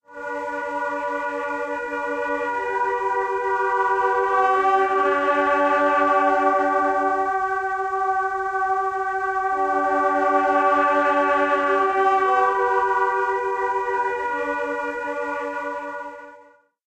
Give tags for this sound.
brass
field-recording
horn
trumpet

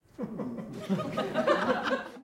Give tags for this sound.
audience
giggle
humor
laugh
funny
happiness
lecture
theatre

